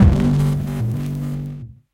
glitch bass g
Synth bass stab sound for Sonic Pi Library. In key of G. Part of the first Mehackit sample library contribution.
synthesizer, electric, machine, effect, mehackit, digital, techy, electronic, sounddesign, bass, glitch, synth, metallic, future, sci-fi, sound-design, weird, sample, glitchy